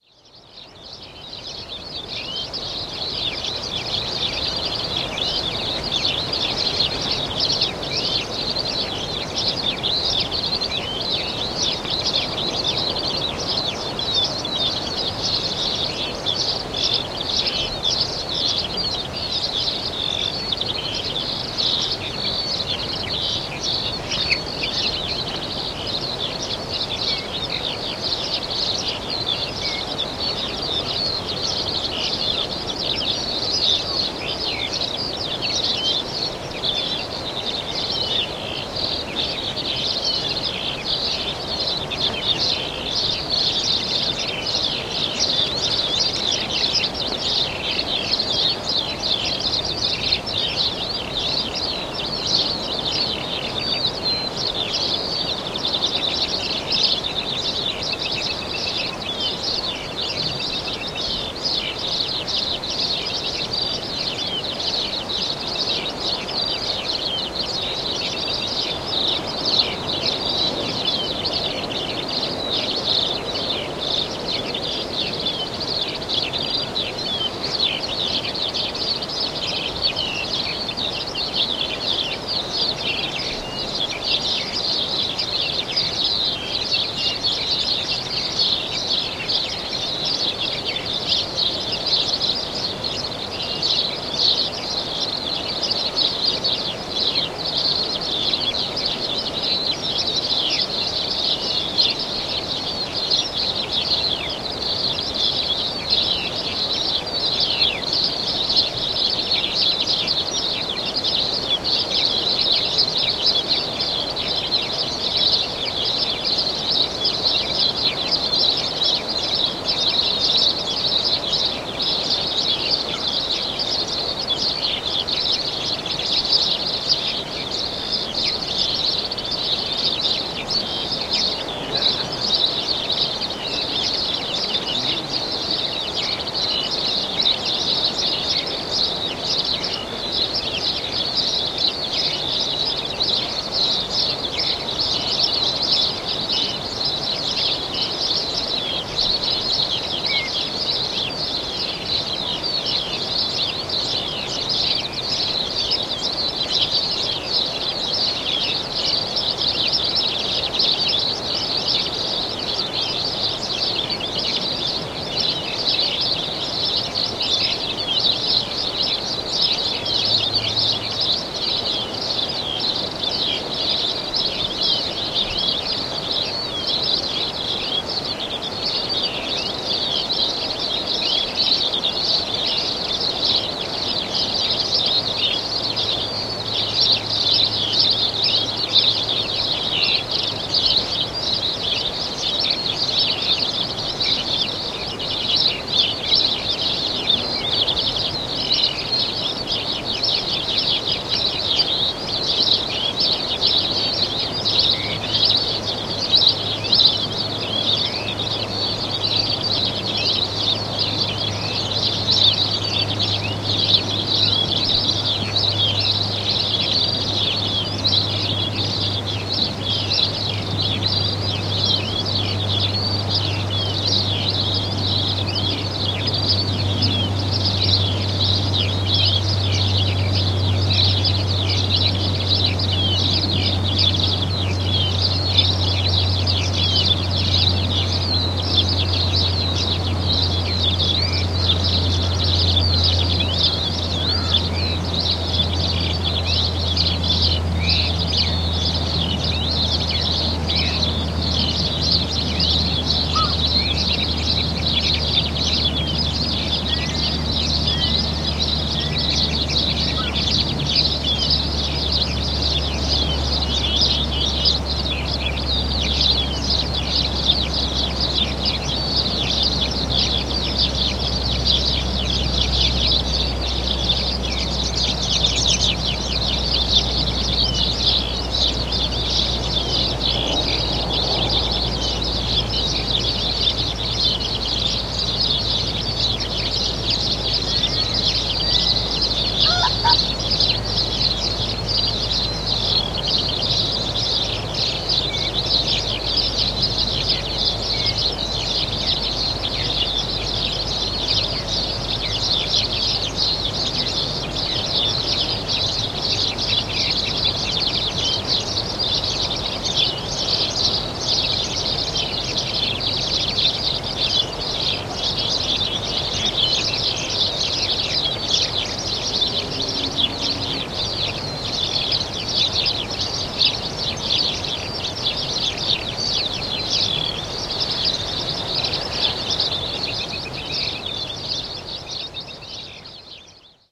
07.Morning-at-Pwll-Caerog
Recording on the Pwll Caerog campsite in the morning, during the summer.
birdsong, field, field-recording, sparrows